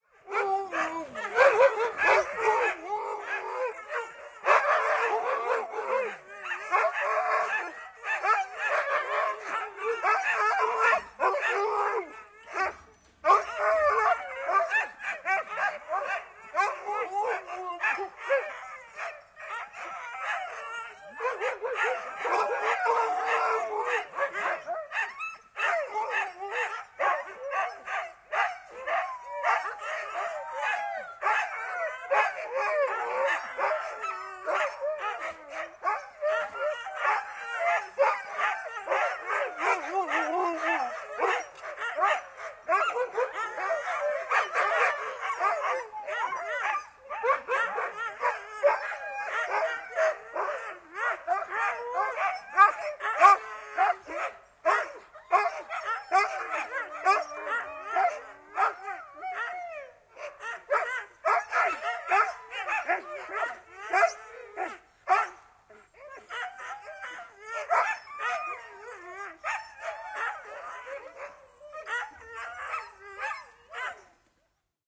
Koirat, vetokoirat, haukkuvat ja ulisevat / Dogs, sled dogs, barking and howling, Siberian Husky
Siperianhusky, lauma koiria haukkuu ja ulisee ennen lähtöä, ulvoo ulkona.
Paikka/Place: Suomi / Finland / Espoo, Hanasaari
Aika/Date: 28.02.1987
Dog, Winter, Animals, Tehosteet, Suomi, Field-Recording, Koira, Yleisradio, Talvi, Finnish-Broadcasting-Company, Soundfx, Sled-dog, Finland, Yle, Vetokoira